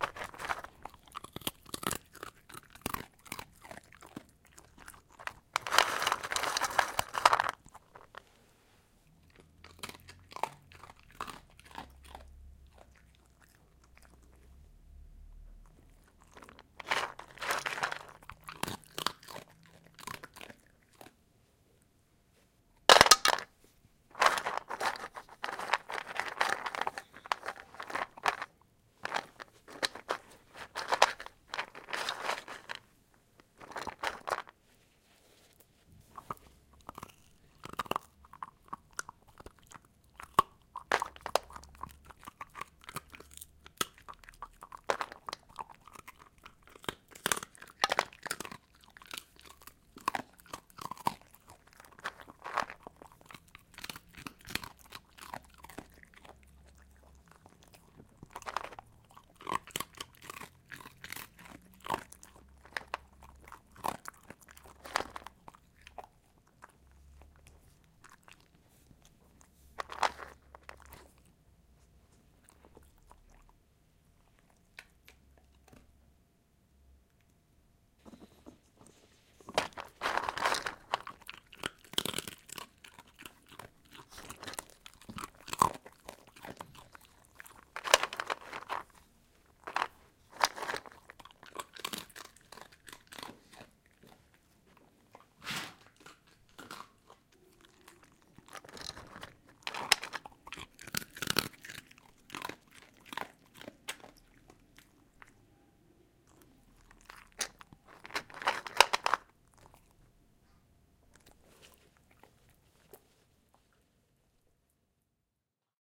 Soul Food 4
A nice black dachshund eating his chunks. His name called Soul and was recorded with the Zoom H4 at home
food, dog, dachshund, breakfast, chunks, kibbles, dinner, puppy, eat, eating